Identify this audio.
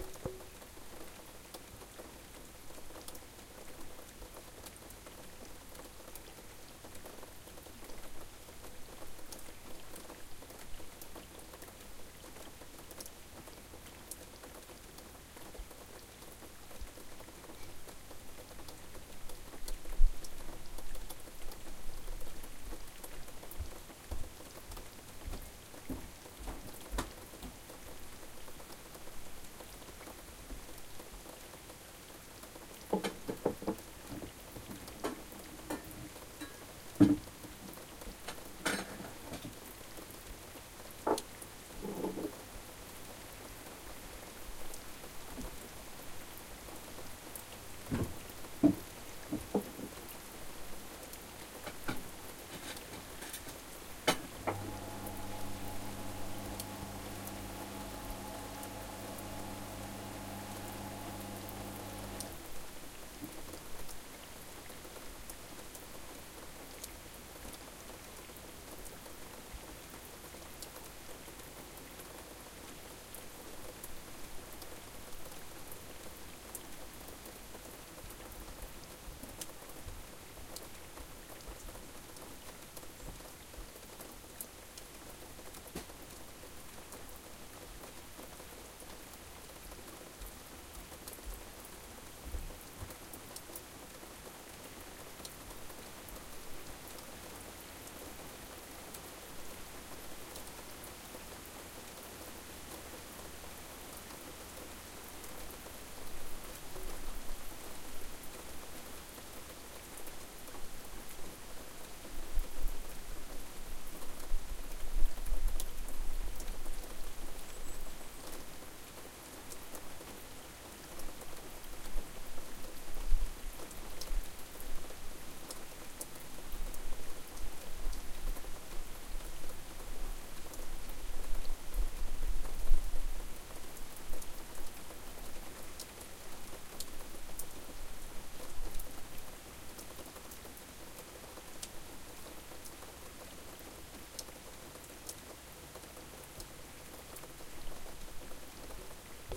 summerrain dwith bg
I've recorded this sample being outside of summer-house. On the background you can listen to how somebody do dishes, steps on wooden floor etc
steps, summer